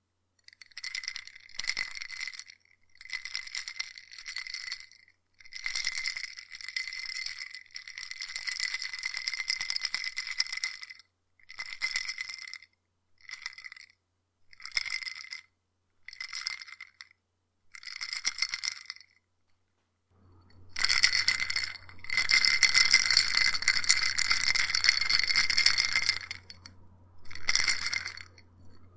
glass ice shaking
shaking glass of ice
drink, glass, glass-of-ice, glass-of-water, ice, liquid, shaking, water